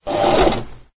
Sewing machine
Recorded and processed in Audacity
factory, Hum, machine, mechanical